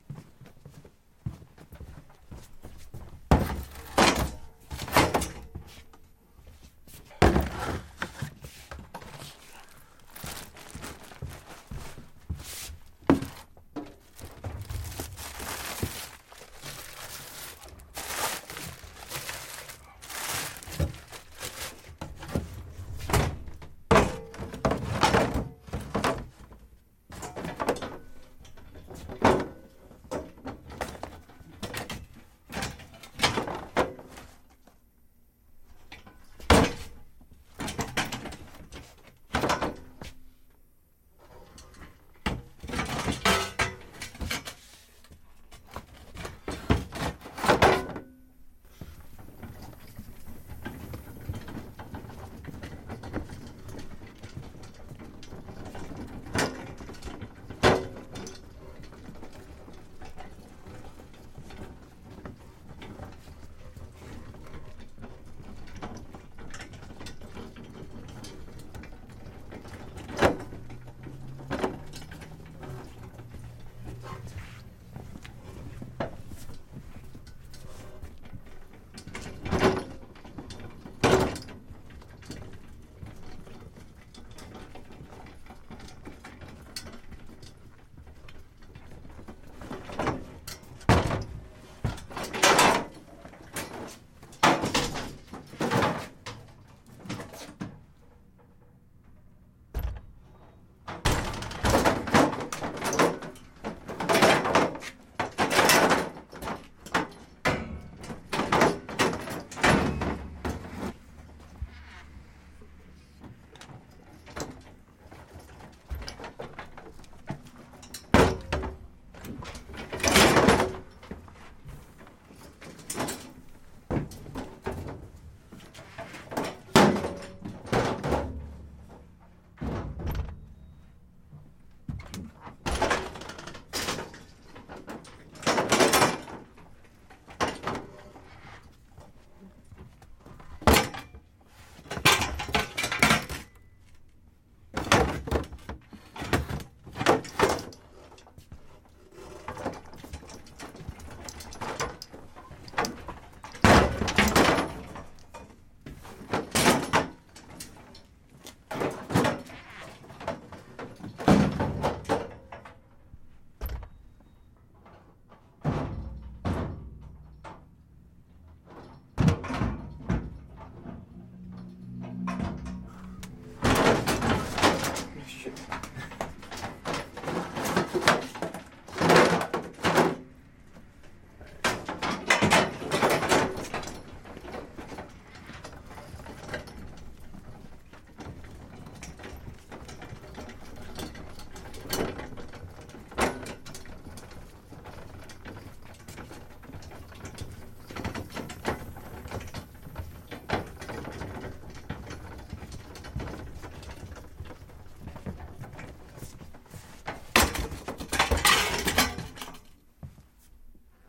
metal delivery dolly with milk crates push through double door and into depanneur corner store store banging around bgsound2
corner, store, milk, push, double, through, delivery, dolly, banging, depanneur, crates, around, metal, door, into